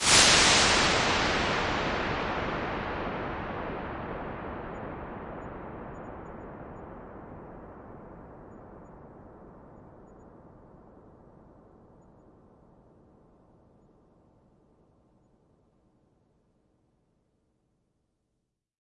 Alesis Microverb IR Large 7
Impulse response of a 1986 Alesis Microverb on the Large 7 setting.